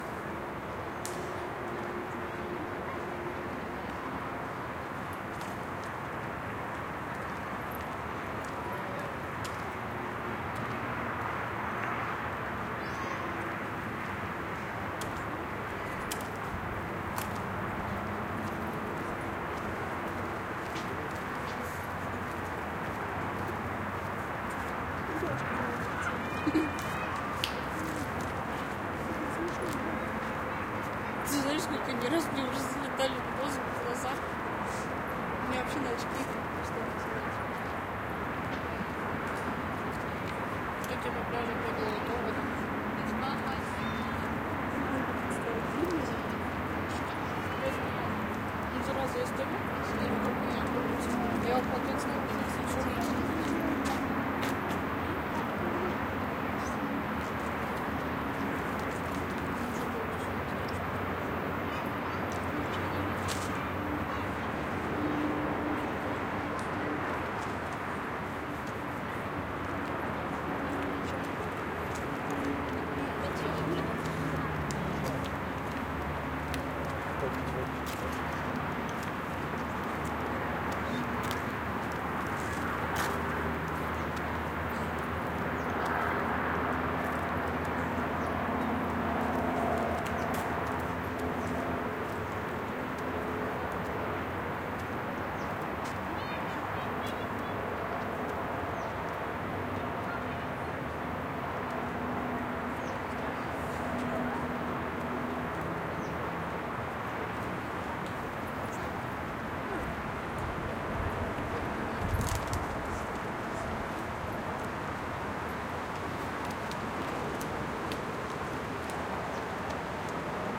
Omsk Victory park 11
Athmosphere in the Victory park, Russia, Omsk. Place slightly into the interior of the park. Hear noise of cars from nearby highway. Children plays. Two women speak on russian.
XY-stereo.
athmosphere, russian-spech, noise, Omsk, park, Russia, victory-park